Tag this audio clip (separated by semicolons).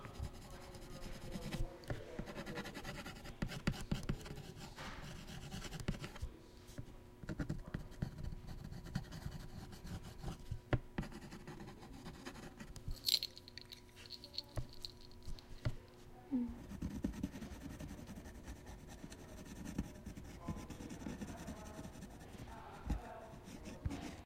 art; colouring; drawing; artist